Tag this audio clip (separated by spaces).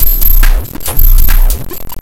glitch-loop experimental percussion